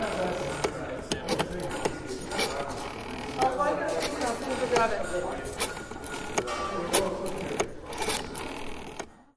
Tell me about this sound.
analog telephone with background noise
this is the sound of an 'old school' telephone - the kind with a circular dial that is turned for each number - recorded at close range (3 inches) by a SONY Linear PCM recorder in a metal-welding warehouse type facility. there is a lot of background conversational sounds.
old, telephone, aip09, phone